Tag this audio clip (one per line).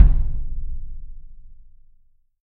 toms
tomtom
taiko
bass
tom
japanese
kick
floor
japan
drum